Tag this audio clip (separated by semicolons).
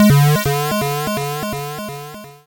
8-bit; 8bit; arcade; chip; decimated; game; lo-fi; retro; square; squarewave; video; video-game; videogame; wave; waveform